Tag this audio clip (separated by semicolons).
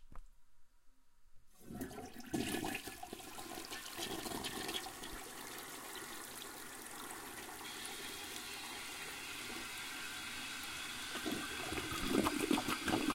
bathroom; water